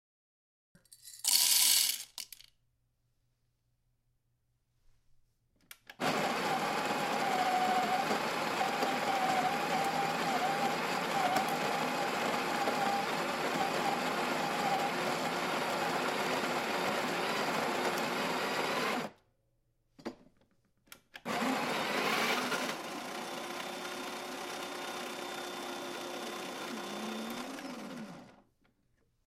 Coffee Beans in Grinder + Grinding
This is a recording of espresso beans being added to a coffee grinder with the grinding process.
This recording has not been altered.
Signal Flow: Synco D2 > Zoom H6 (Zoom H6 providing Phantom Power)
breville, coffee-grinder, espresso, grinding